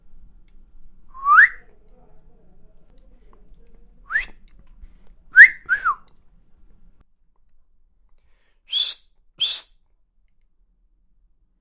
silbar int.3 (G2)
Chiflido con alto nivel de intensidad de hacer un llamado a larga distancia
ambient, body, foley, sound